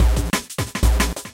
Just a Misc Beat for anything you feel like using it for, please check out my "Misc Beat Pack" for more beats.